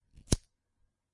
The sound of a clipper lighter sparking up
cig, cigarette, clipper, light, lighter, lighting, spark, up